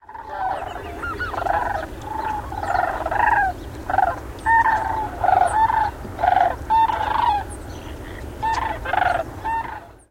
Recorded January 18th, 2011, just after sunset.